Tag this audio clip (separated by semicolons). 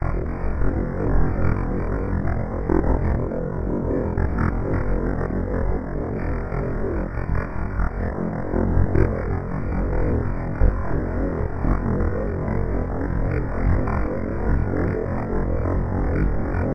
computer; data; rumble; mechanical; cyborg; spaceship; speech; artificial; engine; robot; analog; robotic; alien; machine